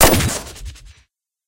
pulse rifle 3
more of my lazer gun collection I made using fl studio. Trust me ....You're gonna WANT these ;)
lazers, weapons